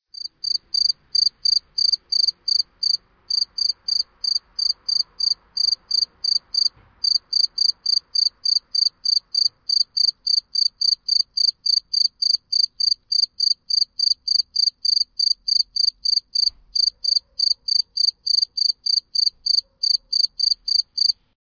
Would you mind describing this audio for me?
A prototypical cricket sound.
cricket, ambient, mono